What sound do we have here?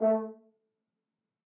One-shot from Versilian Studios Chamber Orchestra 2: Community Edition sampling project.
Instrument family: Brass
Instrument: F Horn
Articulation: staccato
Note: A3
Midi note: 57
Midi velocity (center): 2141
Microphone: 2x Rode NT1-A spaced pair, 1 AT Pro 37 overhead, 1 sE2200aII close
Performer: M. Oprean
a3, brass, f-horn, midi-note-57, midi-velocity-62, multisample, single-note, staccato, vsco-2